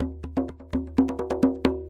tambour djembe in french, recording for training rhythmic sample base music.
drum, djembe, loop